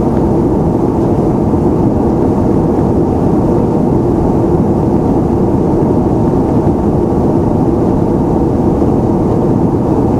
Ambience, Airbus Plane, Interior, Loop, A
(Warning to headphones users!)
A 10 second audio from the interior of an Airbus 777. This sound can be looped seamlessly for as long as required in some programs and software, such as Audacity and Cubase.
An example of how you might credit is by putting this in the description/credits:
Airbus, Airplane, Plane, Loop, 777, Background, Noise, Inside, Interior, Ambiance, Ambience, Repeat